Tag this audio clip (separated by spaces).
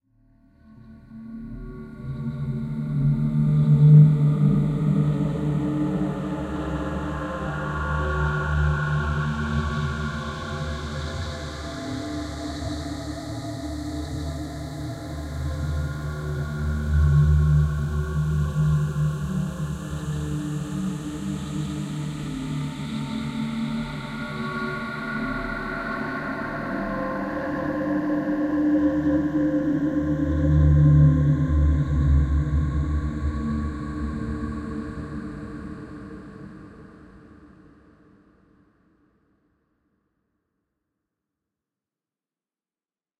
atmos lost soundscape atmosphere space ambient nappes texture